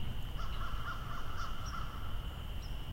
Marsh/Creek ambience throughout.